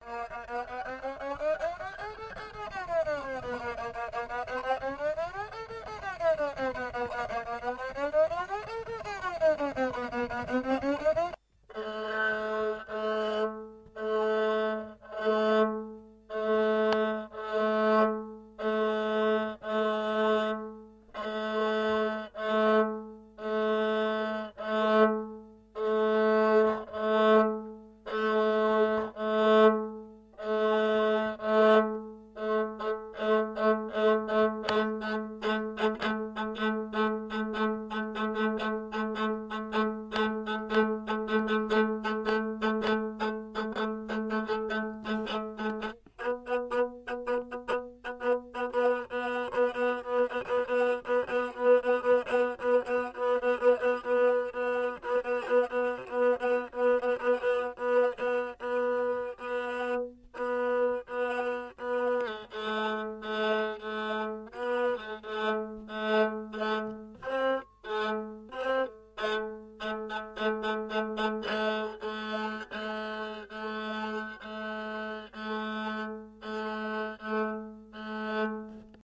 The sound of tynryn (Nivkh fiddle), recorded in a village named Nekrasovka (in the North-West of Sakhalin island) by Michail Chayka.
fiddle, folklore, Nivkh, Sakhalin, traditional